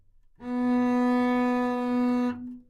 Double Bass - B3
Part of the Good-sounds dataset of monophonic instrumental sounds.
instrument::double bass
note::B
octave::3
midi note::59
good-sounds-id::8624
multisample, single-note